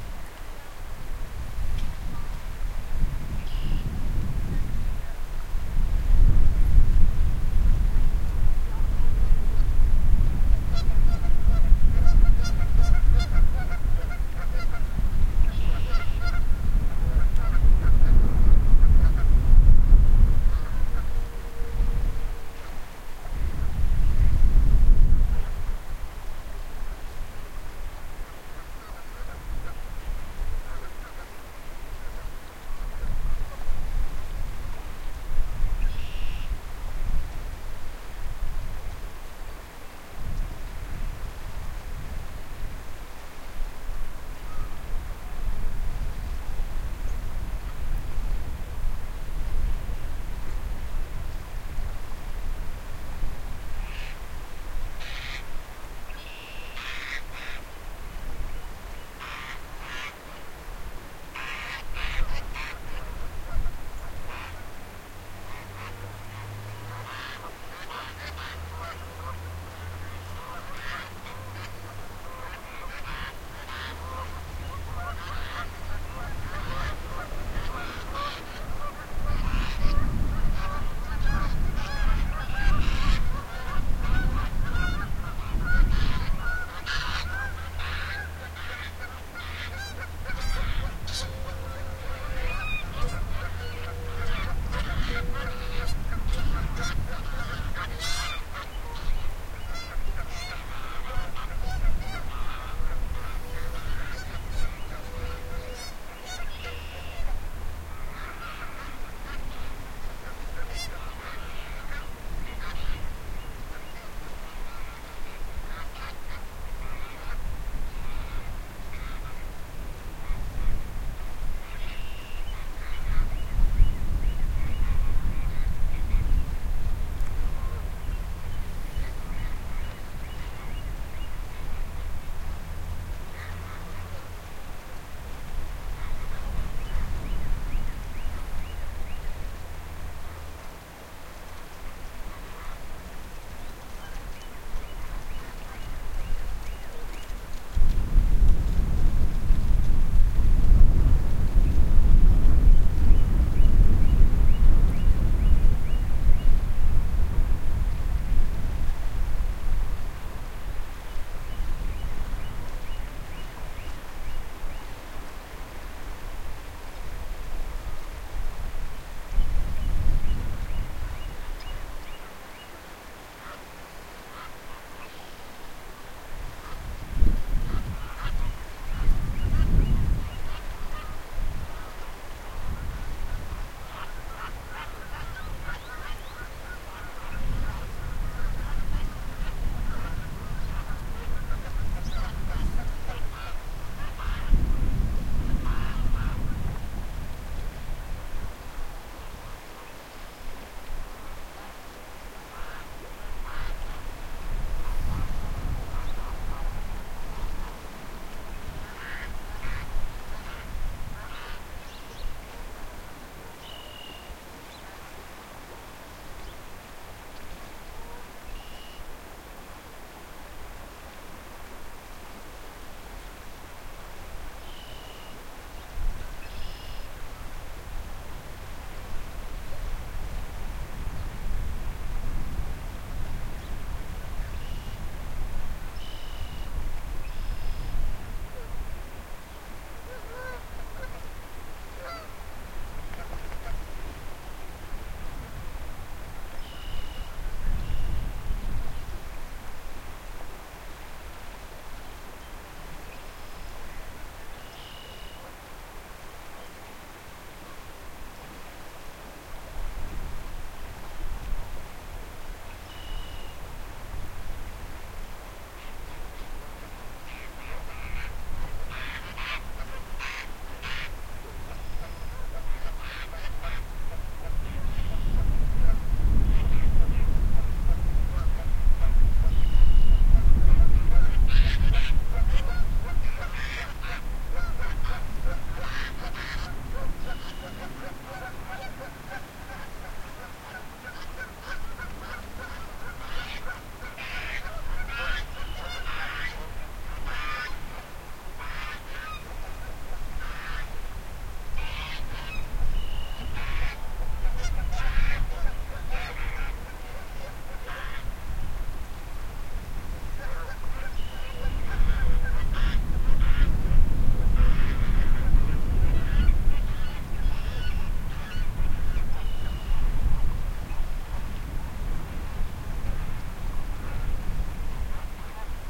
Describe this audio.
river birds 2
Sounds of various birds making all kinds of noise on the river. There is some wind noise in the file, but in parts you can hear the bird calls echoing off the river. You can also hear a fast-flowing river in the background. Recorded with a Marantz PMD-620 digital audio recorder and an Audio-technica PRO-24 mic. Amplification and compression added.